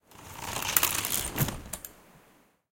Raw sound of short umbrella opening in normal velocity, recorder with tascam dr07
clockworth, close, closing, mechanic, mechanism, metal, open, opening, rain, umbrella